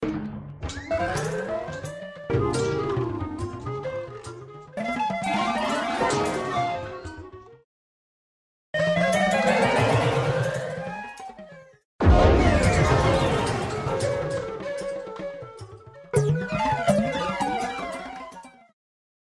trippy circus or carnival sound fx